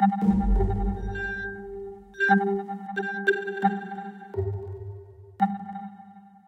vibraphone sequence
vibraphone processed samples remix
sequence, vibraphone, transformation